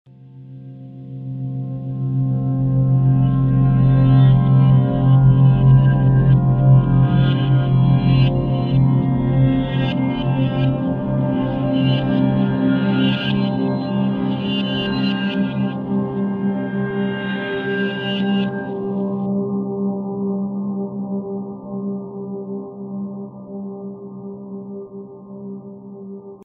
zonged out2
another ambient scape with reverse fx